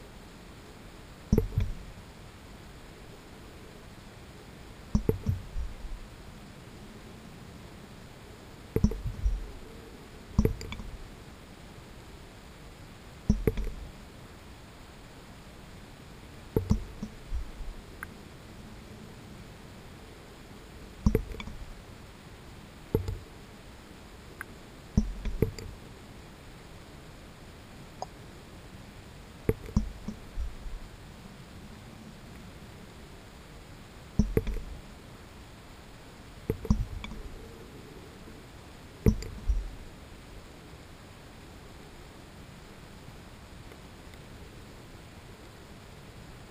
Droplets underwater
Droplets falling on a water surface, recorded with a GoPro Hero dipped underwater in a washtub, some background noise but I hope some find it useful.
tap, bathroom, droplet, drop, scary, drip, wet, kitchen, underwater, sink, water, plop